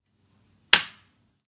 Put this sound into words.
12. Golpe Palo (4)
Golpe, Madrazo, Palo